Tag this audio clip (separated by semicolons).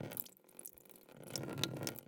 anechoic-chamber; drip; plastic; bucket; field-recording; purist; water